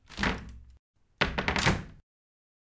Wooden Cupboard Open Close
Wooden cupboard door opened and closed.
cupboard, open, wood